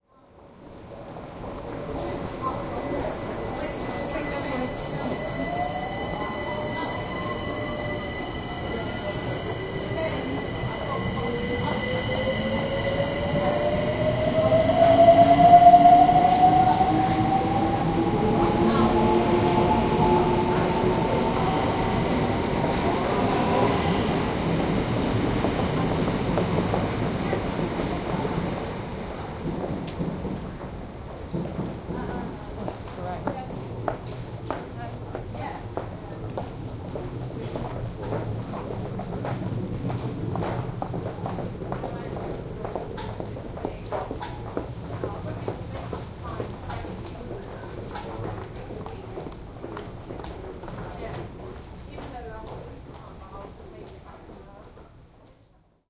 Electric train pulling away from station in Blackheath London. Some footsteps and quiet talking. Recorded in stereo with an Edirol R1 using the internal mic.
train, blackheath, footsteps, london, uk, trains